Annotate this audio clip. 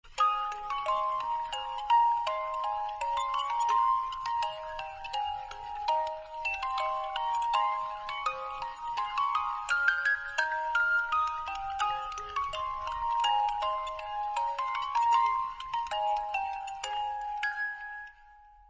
Deck The Halls Musicbox
Christmas music. Deck the halls played by a musicbox
Musicbox, xmas